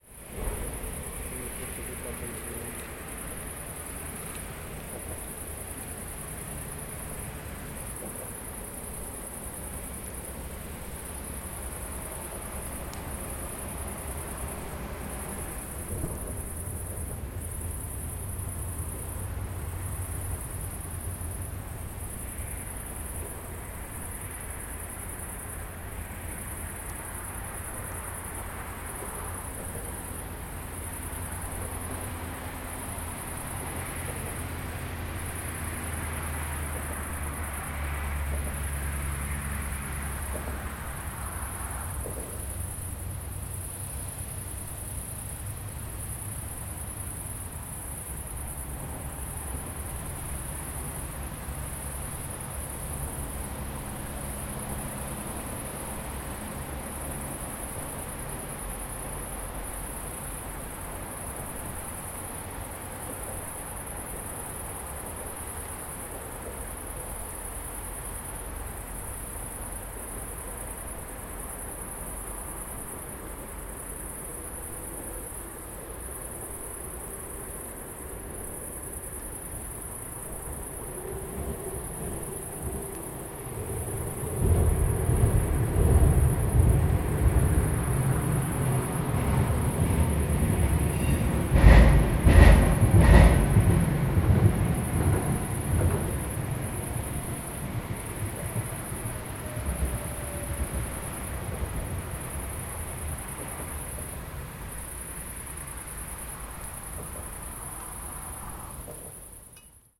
29.07.12 cricets under the bridge
29.07.2012: recorded at night under the one of bridges in Poznań (Poland). Intense sounds of passing by cars, trucks and tramways mixed with thecrickets music. Recorder - zoom h4n (internal mikes).
tramway, cricket, Poland, cricets, Poznan, truck, meadow, fieldrecording, grasshopper, bridge, car, noise